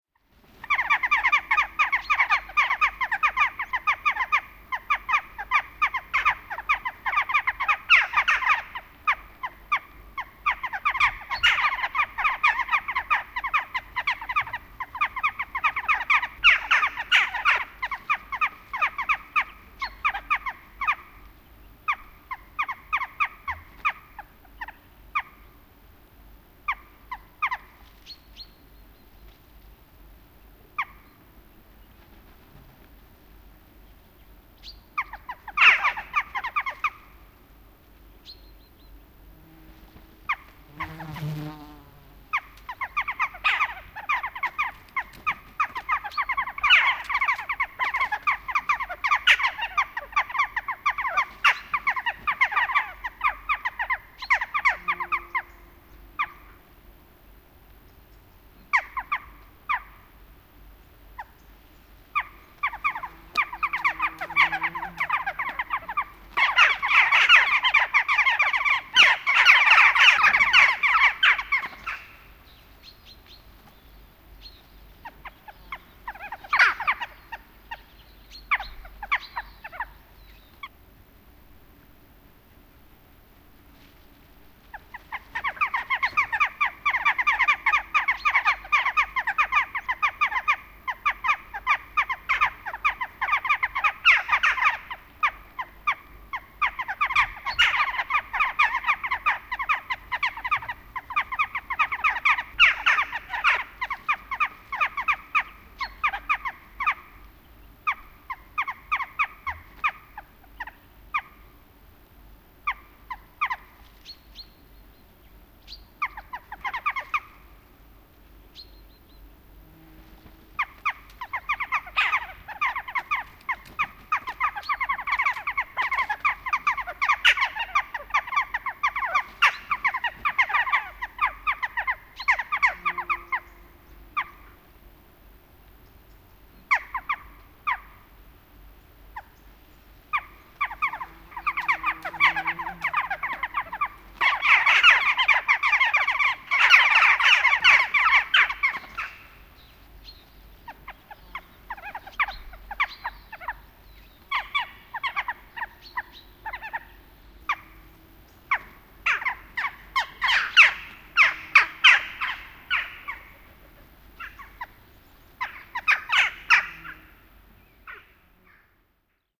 Naakka, naakkaparvi / Jackdaw, a small flock of jackdaws at the ruins, some insects and small birds in the bg, autumn (Corvus monedula)
Pieni parvi naakkoja ääntelee raunioilla. Taustalla vähän muita lintuja, jokunen hyönteinen. Syksy. (Corvus monedula)
Paikka/Place: Suomi / Finland / Raasepori
Aika/Date: 24.09.1996